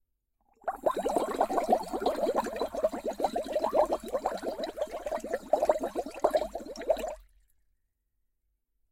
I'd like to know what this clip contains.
Bubble Long Sequence
Heavy bubble sequence deep in water with air can
Air, Bubbles, Long